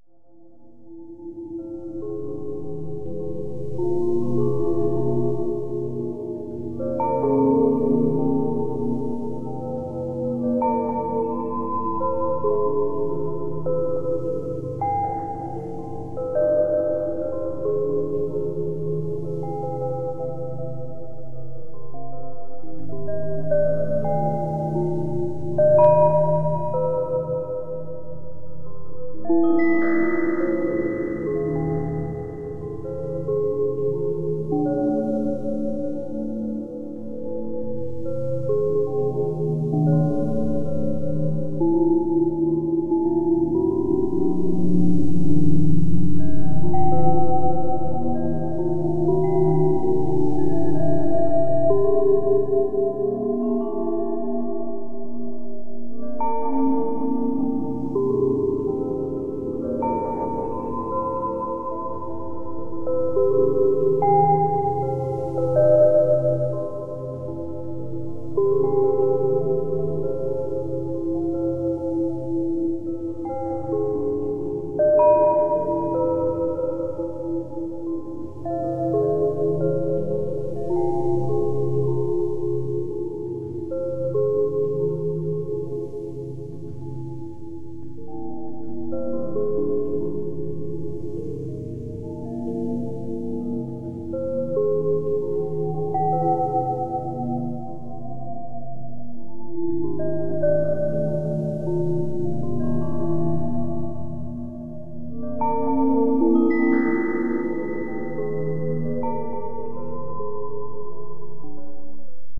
Temple bowls 1
Temple bowls treated with various Max/Msp delay and harmonisers.
bowls, percussion